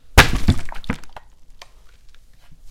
bottle hit 4
Recording of a bottle of water being thrown against my chest or into a bucket containing more bottles and water. Recorded using a Rode NT1 microphone.
splash, bottle, water, hit